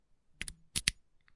Lock 1 - Insert Key 1
Key inserted into a lock